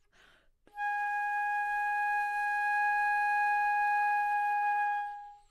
overall quality of single note - flute - G#5
Part of the Good-sounds dataset of monophonic instrumental sounds.
instrument::flute
note::Gsharp
octave::5
midi note::68
good-sounds-id::122
dynamic_level::p
Gsharp5, single-note, neumann-U87, multisample, good-sounds, flute